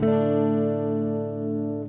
Jackson Dominion guitar run through a POD XT Live Mid- Pick-up. Random chord strum. Clean channel/ Bypass Effects.
chord, clean, electric, guitar, strum